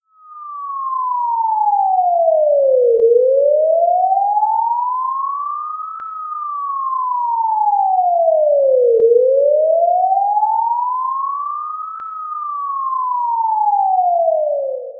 CRUMIERE Robin 2019 2020 FiretruckSiren
This sound was made on Audacity. I generated a chirp going from 440 Hz (amplitude 0.8) to 1320 Hz (amplitude 0.1). I copied-pasted the sound a few times to have something which sounds like kind of a siren. I reduced the volume by 6dB and reversed the direction of the copied-pasted sounds. Then, I used the cut function and the fade-in and fade-out effects to erase the « crack noises » and to obtain a smooth transition when the siren goes up or down. I finally added a reverb effect to feel like the siren is heard from an empty street.
reverb, emergency, street, firetruck, police, truck, ambiance, siren